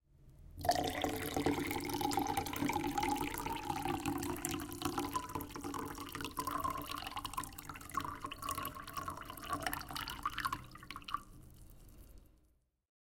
Water, pouring into glass
Water being poured into a glass.
beverage, drink, glass, pour, pouring, liquid, Water